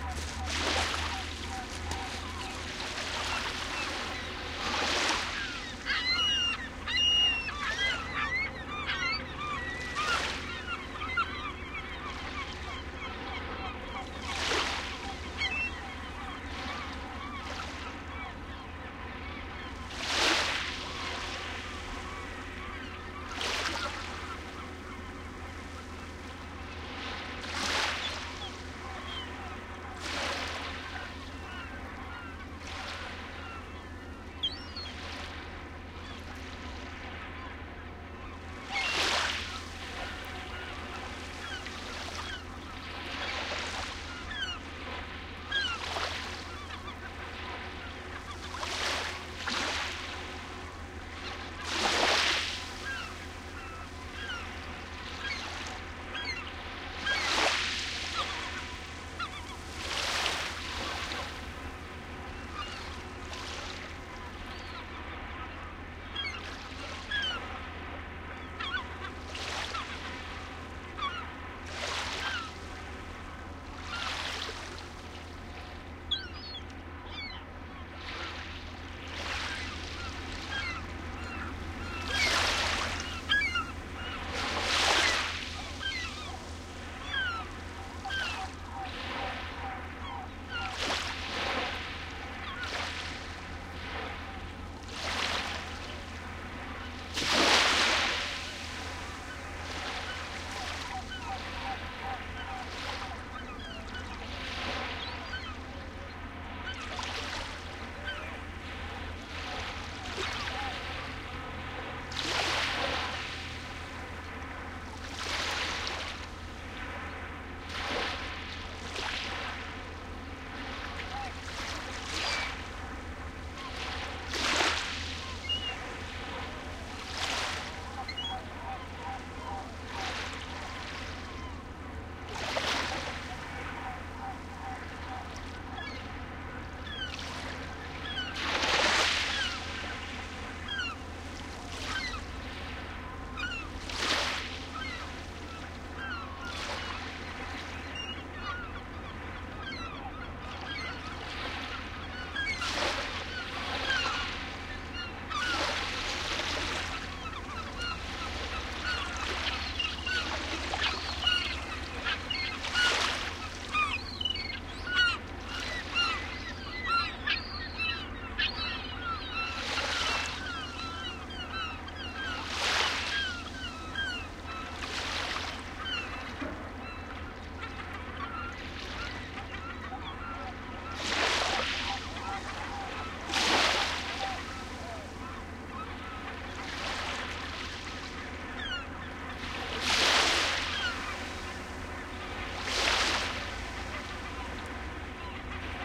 waves splashing on the beach at St Anne des Monts, Quebec, with seagull screechings in background. Shure WL1823 into Fel preamp and Edirol R09 recorder